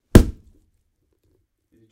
Some gruesome squelches, heavy impacts and random bits of foley that have been lying around.
gore, splat, squelch, death, mayhem, foley, blood